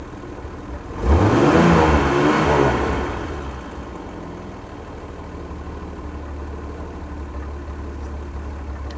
E-type Jaguar, car engine, rev-twice ,mono
E-type Jaguar engine recorded reving twice standing infront of the car hearing more of the engine.